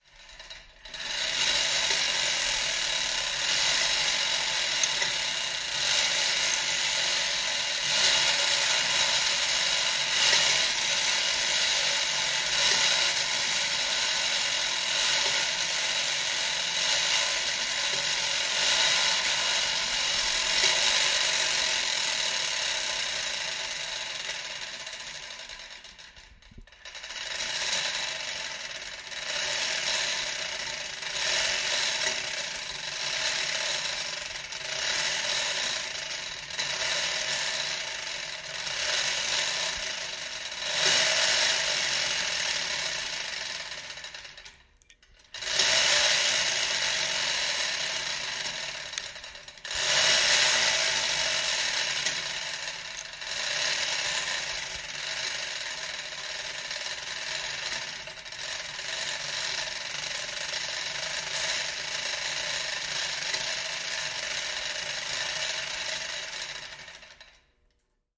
metal shop hoist chain thick pull2
chain, hoist, metal, pull, shop, thick